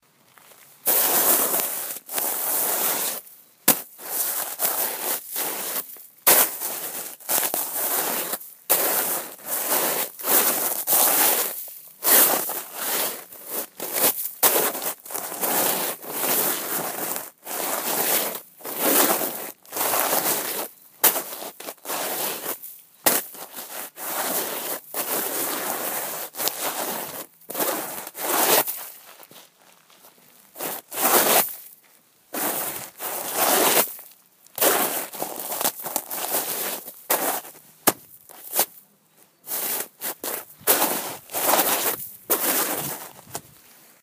Snow shovel
Man shovel snow in winter
snow, thaw, winter, snow-shovel, cold, shovel